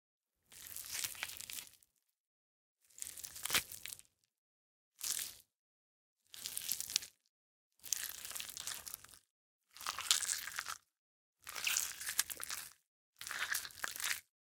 Mushing a banana peel in my hands. Recorded with an NT1.